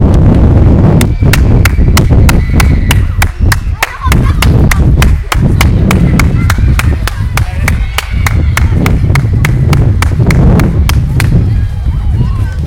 about the school FRLG school bell
school bell with hands
rennes, schoolbell